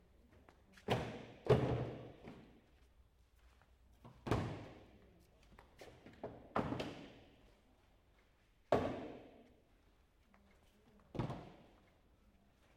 Closing & Latching Plastic Toolbox
Several closes and latches of a large plastic toolbox.
Alex Fitzwater/needle media 2017
trunk, lock, tool, close, toolbox, slam, shed, hardware, workshop, latch, foley, plastic, shut, work, case